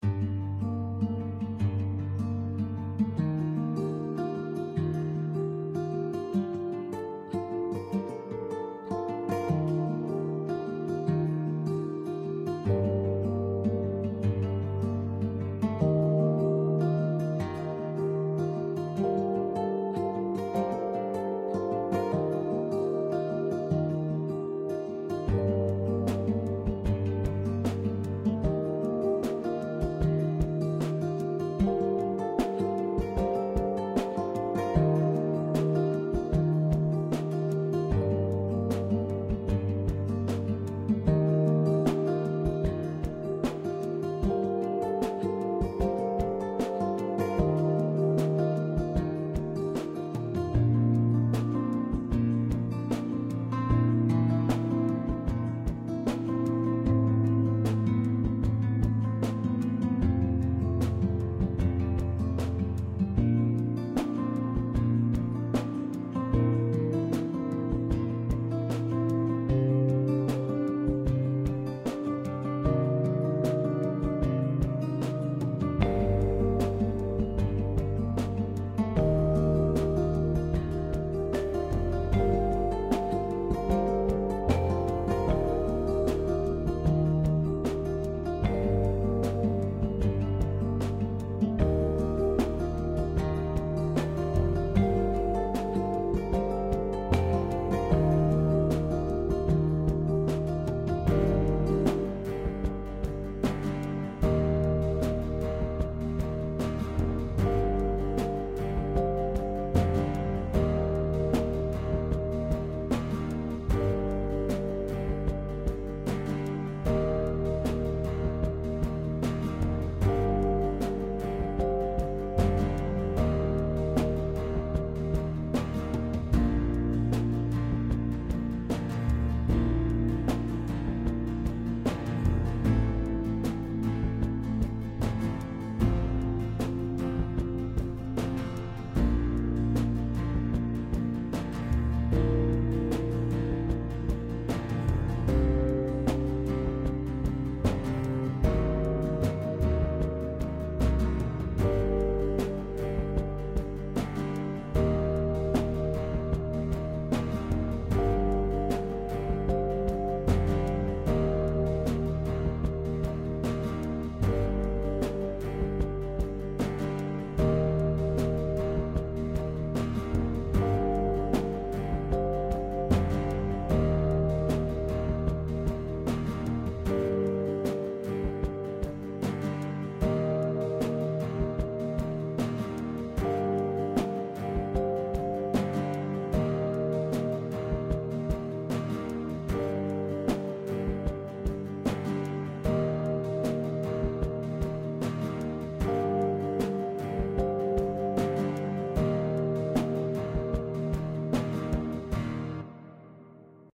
Melancholic Music
background, guitar, loop, Melancholic, music